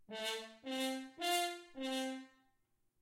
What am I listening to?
horn stopped staccato A3 C4 F4
The "stopped horn" sound produced by blocking off the bell with the right hand. Four short notes are produced; A3, C4, F4 and C4. Recorded with a Zoom h4n placed about a metre behind the bell.
a3, brass, c4, horn, muted